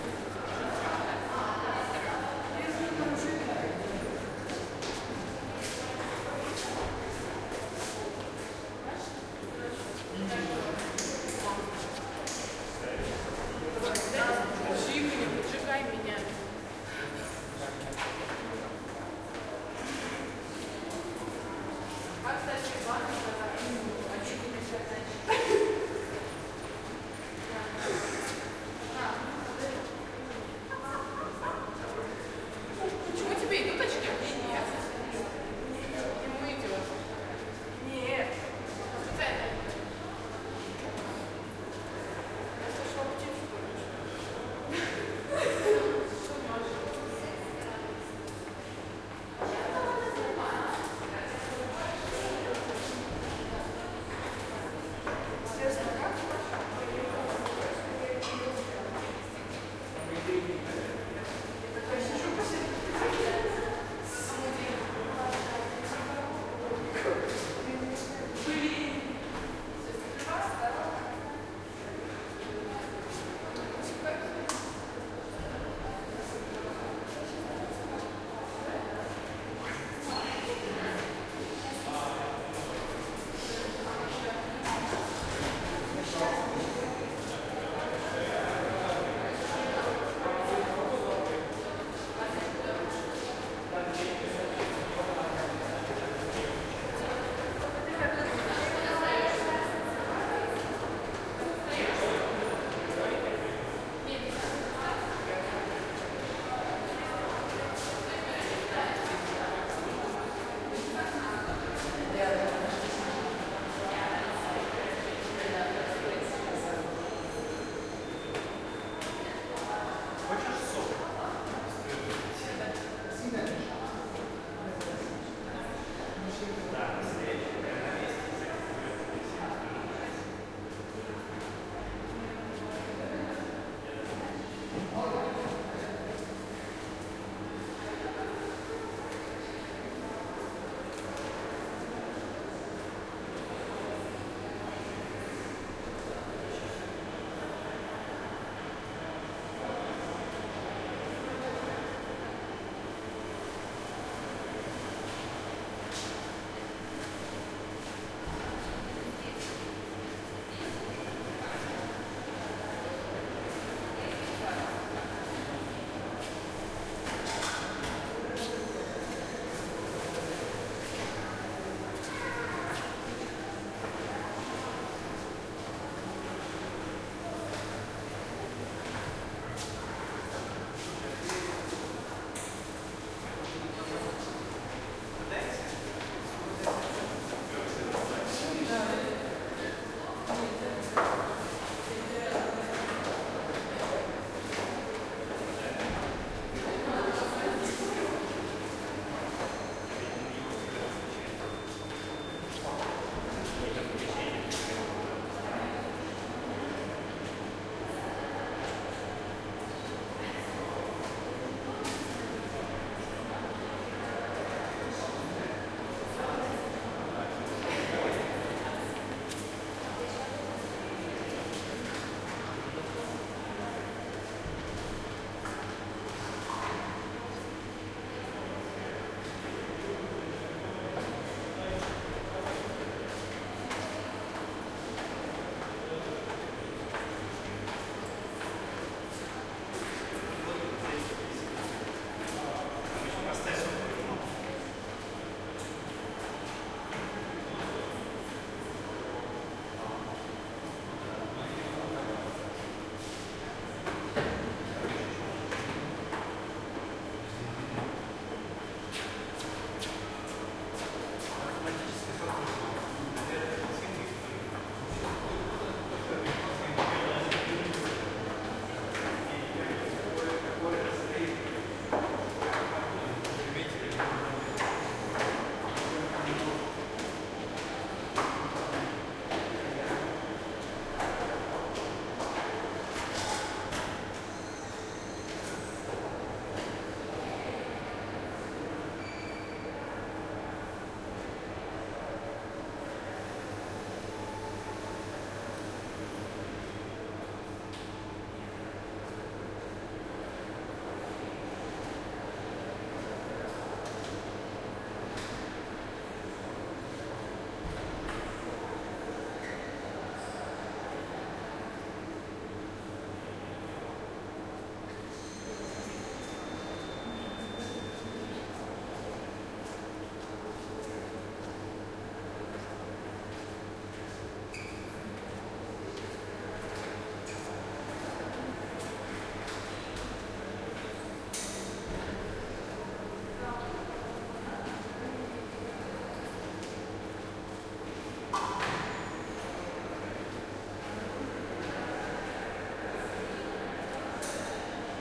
Stairs & hallway ambience - theatre "School of dramatic art", Moscow Jul 16 XY mics
Stairs & hallway ambience at the theatre "School of dramatic art", Moscow Jul 16, Roland R-26's XY mics.
People talking, walking, distant voices, reverb, ventilation buzz.
ambience, atmosphere, background, buzz, crowd, field-recording, hallway, Moscow, people, Russia, Russian, stairs, talking, theatre, ventilation, voices